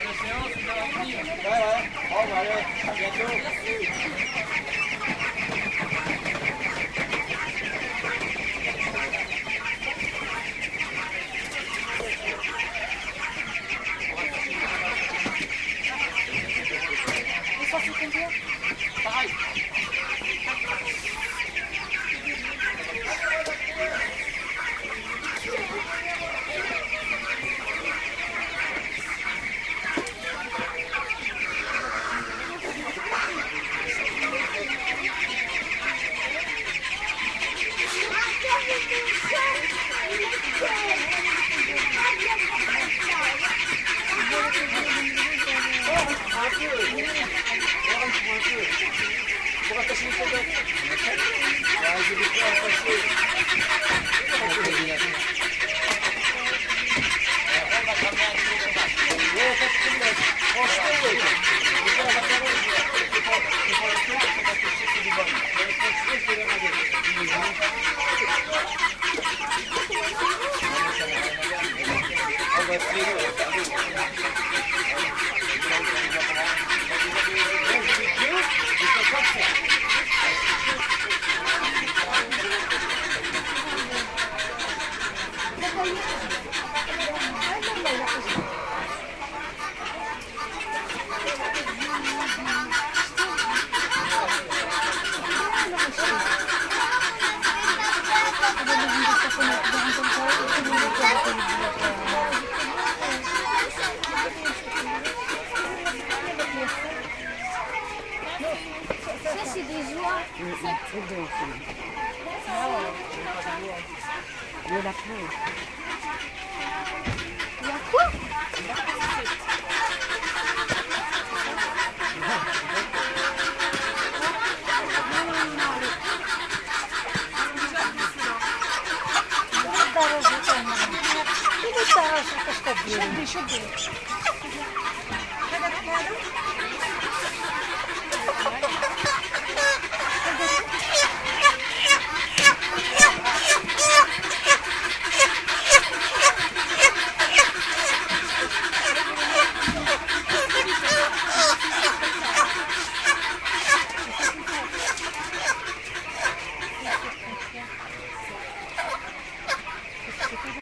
A market place in France with ducks and chicken